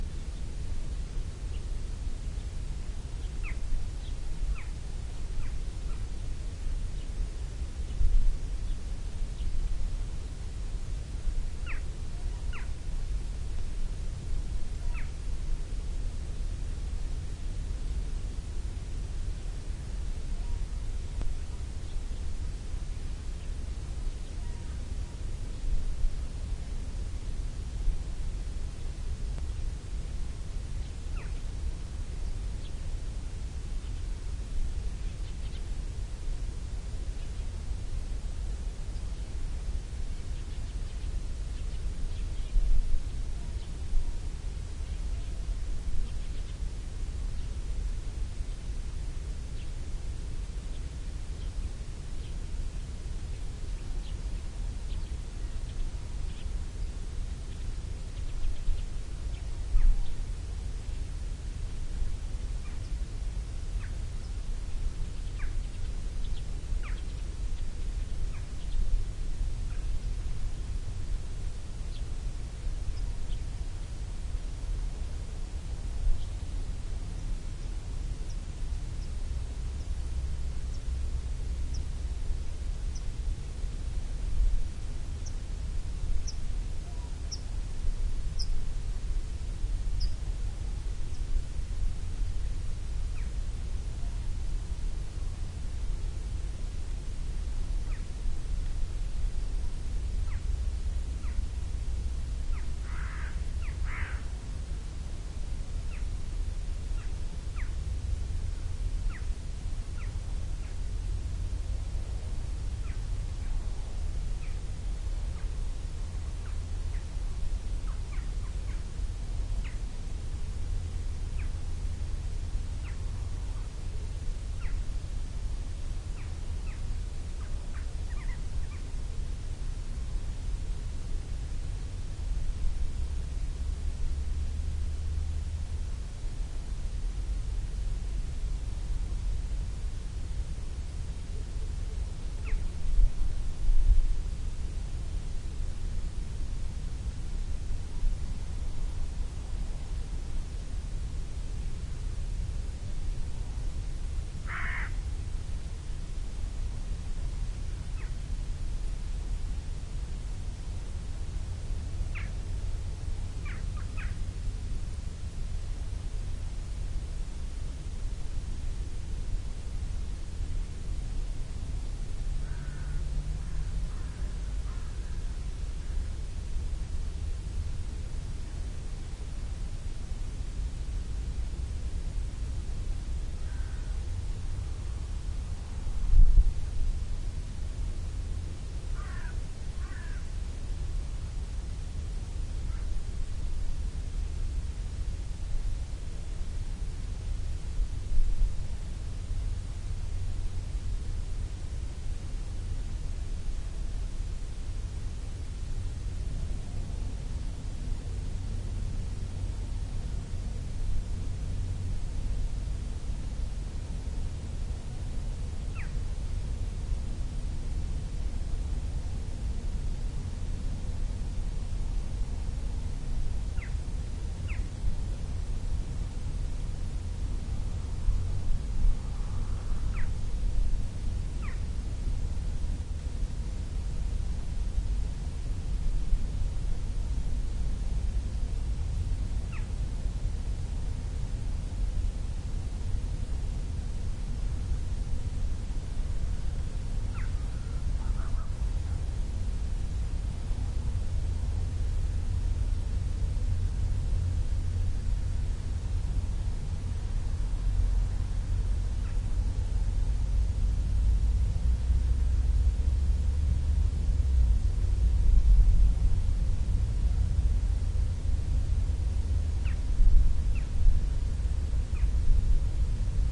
winter afternoon birds
A fieldrecording in the danish countyside. Different birds can be heard here, along with a bit of wind every now and then. The machinery from some farmers near by, is making an almost not noticeable low dronelike noise, in the background.
Recorded with a Sony HI-MD walkman MZ-NH1 minidisc recorder and a pair of binaural microphones. Edited in Audacity 1.3.4 beta
binaural, birds, drone, farmer, fieldrecording, wind, winter